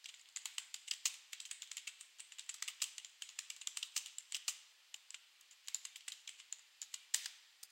Typing on Keyboard
Typing Sound on keyboard